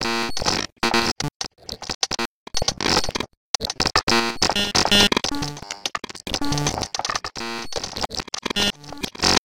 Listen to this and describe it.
clicks and pops 13
A strange glitch "beat" with lots of clicks and pops and buzzes and bleeps. Created by taking some clicks and pops from the recording of the baby sample pack I posted, sequencing them in Reason, exporting the loop into Argeïphontes Lyre and recording the output of that live using Wire Tap. I then cut out the unusable parts with Spark XL and this is part of the remainder.
noise, glitches, click, pop, pops, glitch, beats, idm, beat, granular, clicks